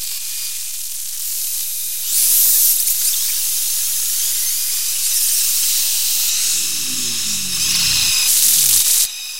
dark full drone with a light varying drone in the foreground; done with Native Instruments Reaktor and Adobe Audition